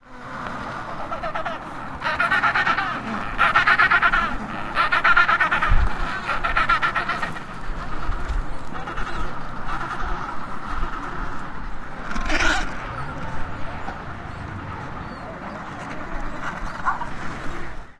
Pinguin Colony at Gourdin Island in the Antarctica Peninsula
Recording of a penguin Colony at Gourdin Island in the Antarctica Peninsula, using a Shotgun Microphone (Schoeps)